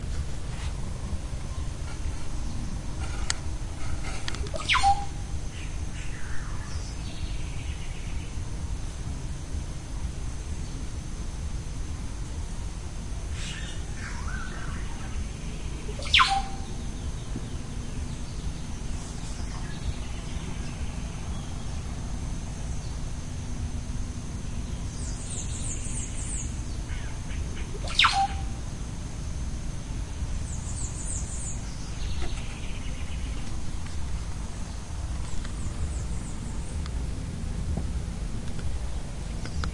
it has been taken in Pucallpa, Peruvian jungle